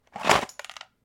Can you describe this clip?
Box-Small-Cardboard-Shaken-Top Off-09
This is the sound of a small cardboard box that has a few odds and ends in it being shaken. In this particular sound the top of the box has been removed giving it a different resonance.